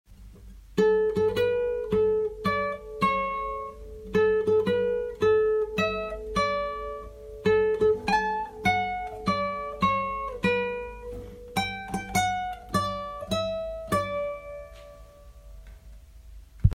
Short guitar clip. A4 frequency is 432 Hz
nylon, acoustic, guitar, strings